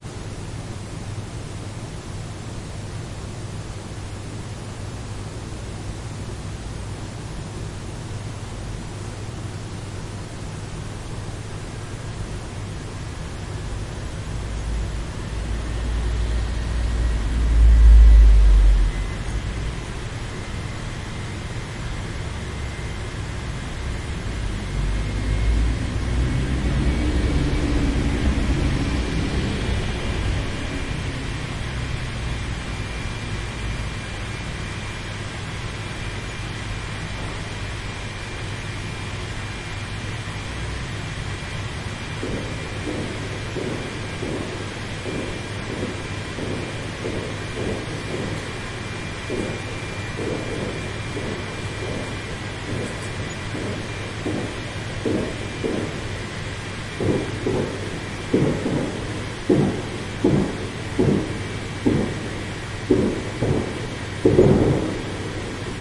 Roomtone Hallway Spinnerij Front
Front recording of surround room tone recording.
sounddesign, roomtone